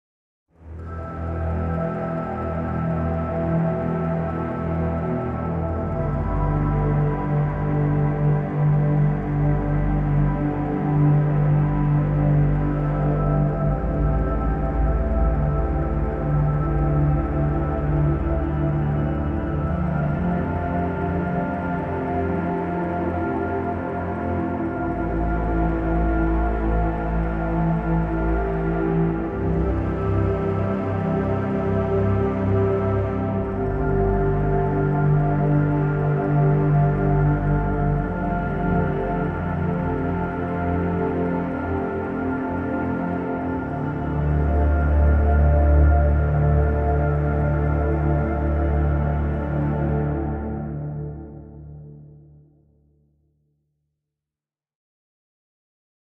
Build and Descend (BBC symphony)
Enjoy. Just a nice orchestra thing.
Made with the LABS BBC symphony pack.
Not a recording of the live orchestra
acoustic, bowing, cinematic, classic, contrabass, double, ensemble, orchestra, orchestral, strings